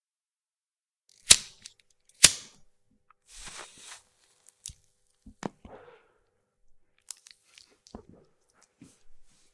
Lighting smoking Cigarette
Lighting and Smoking a cigarette.
Recorded with Neumann KMR 81 + Nagra Ares BB+.